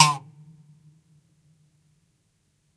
samples in this pack are "percussion"-hits i recorded in a free session, recorded with the built-in mic of the powerbook
plastic, noise, knarr, wood, knarz